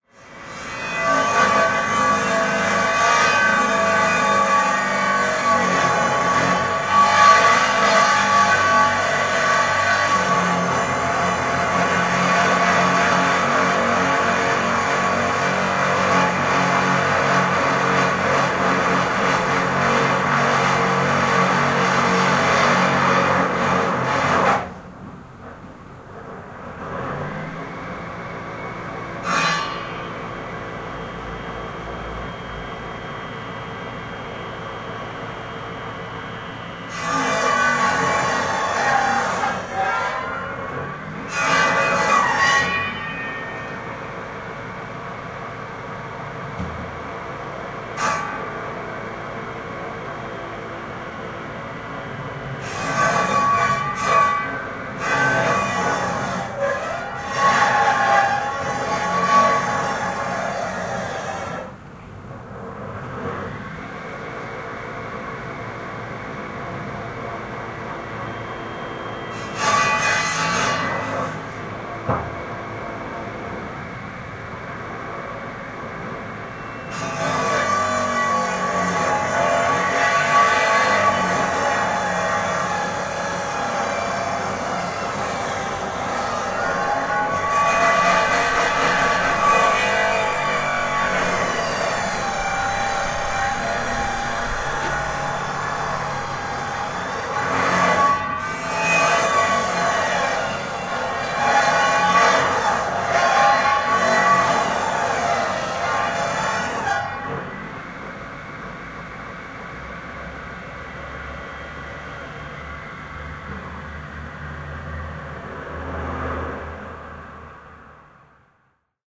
annoying-neighbors-on-saturday-afternoon
annoying neighbors on saturday afternoon
circular, germany, homeworker, neighbors